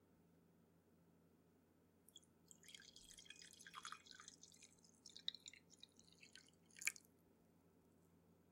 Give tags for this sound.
splash; drink-pour; water-pour; water

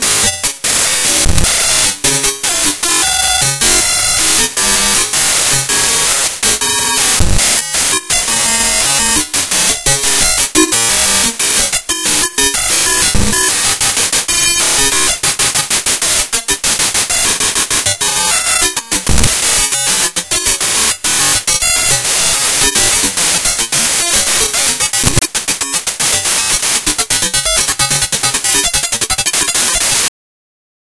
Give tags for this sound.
clicks; data; glitches; harsh; raw

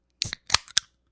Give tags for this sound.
can; soda; opening; beer; aluminum

